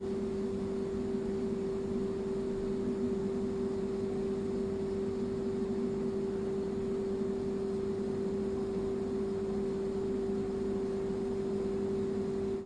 Vending Machines - Hum 2
Buzzing\humming sound of vending machine
buzz, hum, machine, mechanical